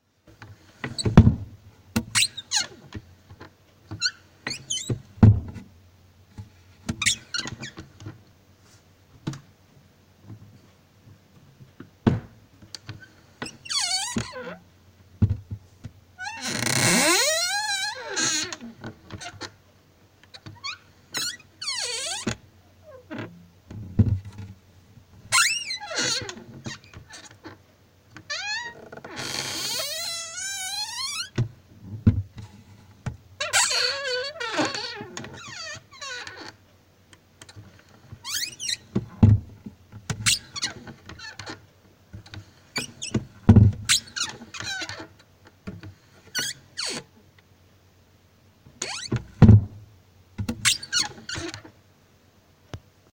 Kitchen cabinet door squeak and slam